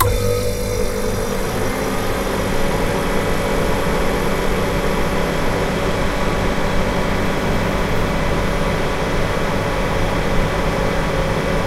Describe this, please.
The sound of an ice-maker turning on.
Recorded with a Zoom H1 Handy Recorder.